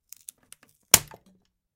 Wood Snap 1
broken-bone, pain, sharp, snap, wood